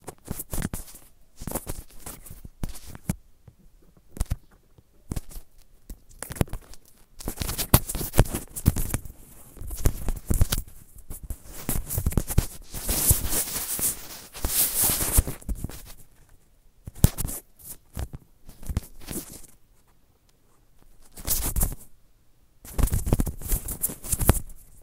Headphone Mic noise
Noises of a headphone mic being moved around and played with. Recorded from iPhone 11.